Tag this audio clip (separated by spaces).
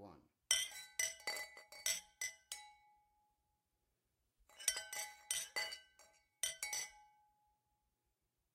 clinking glass toast foley wine